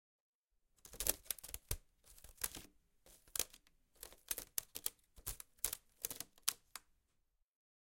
11 Cat, scratch
Cat is scratching wicker chair
Cat, Chair, CZ, Czech, Panska, Scratch, Scratching, Wicker